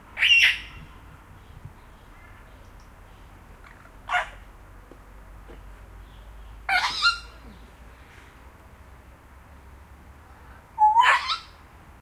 fr0608bg Grey Parrot 2
The varied calls and screehes of the Grey Parrot. Recorded at Le Jardin D'Oiseaux Tropicale in Provence.
bird, bird-call, bird-song, field-recording, grey-parrot, jungle, tropical-bird